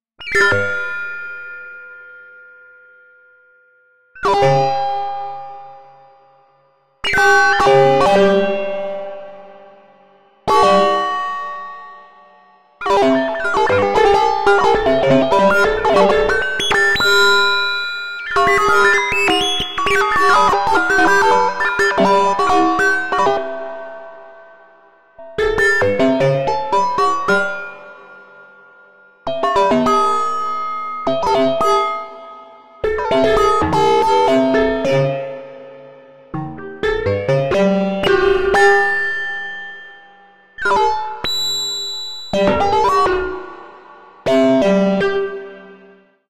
Perky Aalto
Created in the soft synth Aalto as an up tempo finger exercise for the arthritic keyboard player.
Aalto, electric